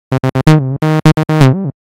Loops generated in Propellerhead Reason software.